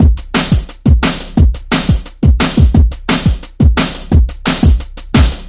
pants loop
loop beat drum
drum, loop